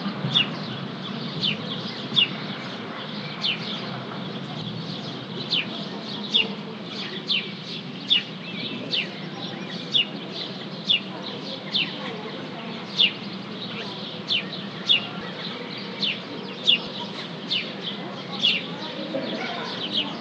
Morning in the country. With various birds chirping, some frogs croaking and some people talking unintelligibly in the background. Recorded with Zoom H4n and edited in Adobe Audition.
birds, chirps, h4n, in-the-country, morning, sparrows